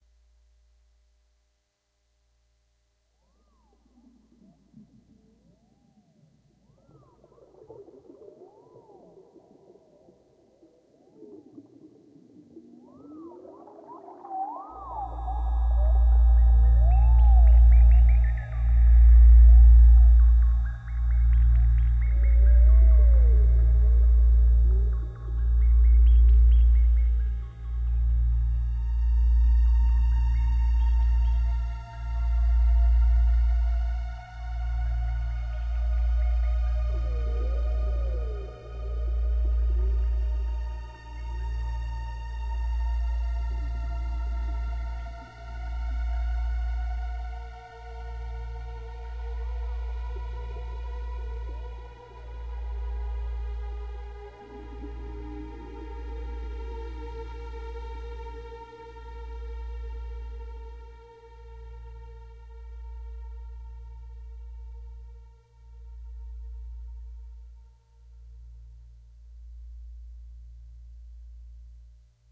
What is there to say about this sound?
forest ufo synthesizer - Wald 2
forest, synthesizer, ufo